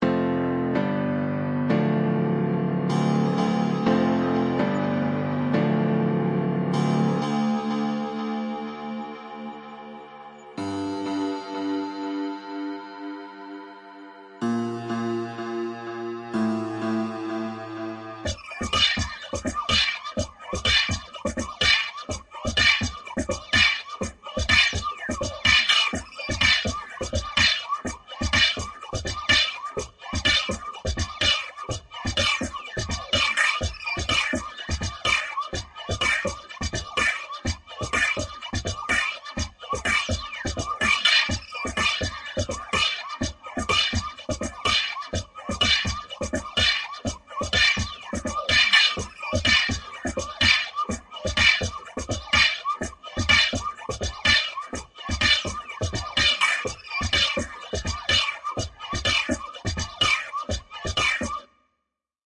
this is something i made a long time ago
this is made by me a long time ago! made in garageband
sample, free, instruments, music